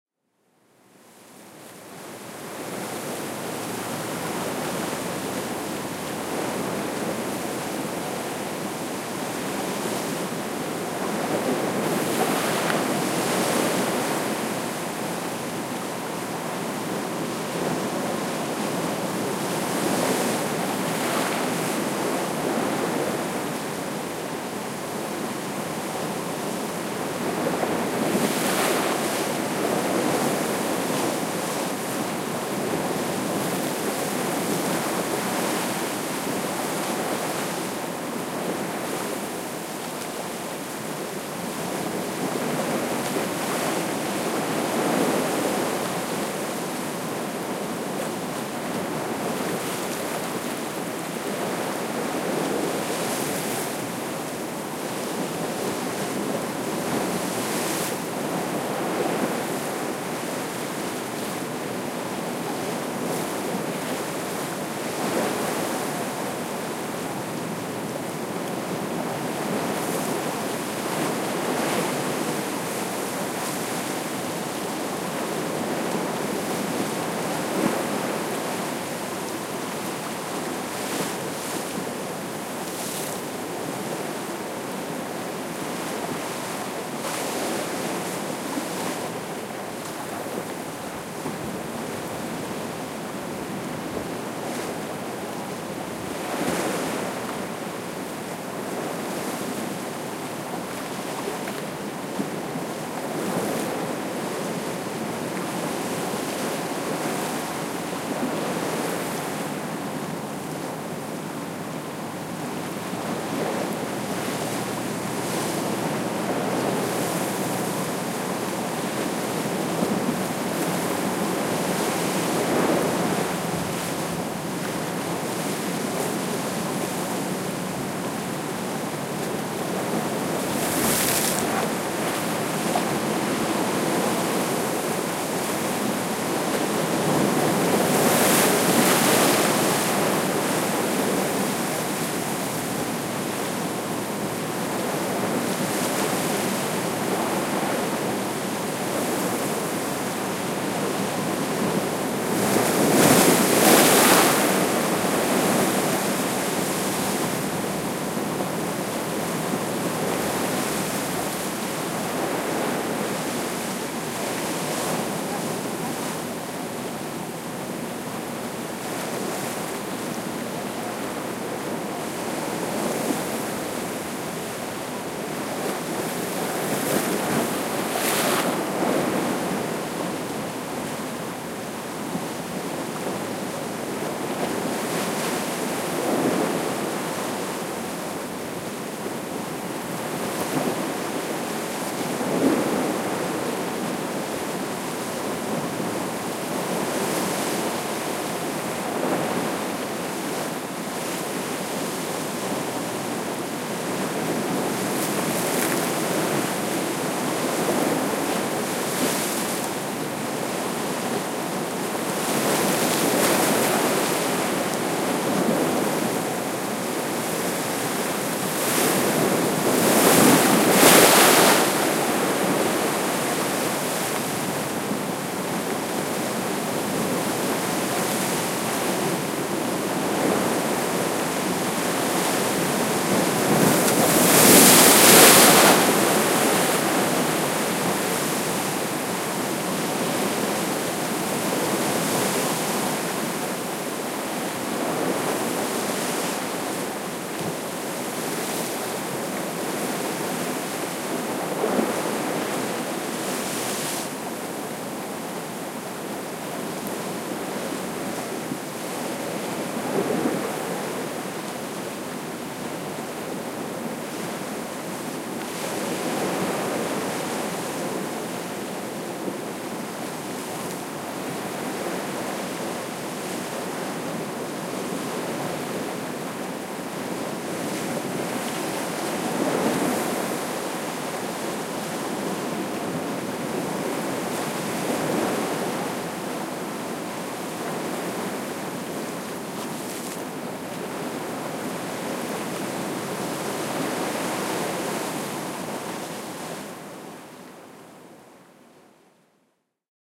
Atlantic Ocean At Acadia
A gentle August day on the rocky coast of Maine.
coast, ocean, waves